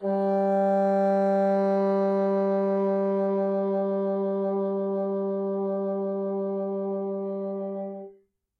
One-shot from Versilian Studios Chamber Orchestra 2: Community Edition sampling project.
Instrument family: Woodwinds
Instrument: Bassoon
Articulation: vibrato sustain
Note: F#3
Midi note: 55
Midi velocity (center): 95
Microphone: 2x Rode NT1-A
Performer: P. Sauter
bassoon, vsco-2, multisample, single-note, vibrato-sustain, midi-note-55, fsharp3, midi-velocity-95, woodwinds